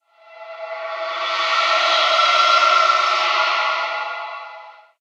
Another version of "magic", something long and swishy.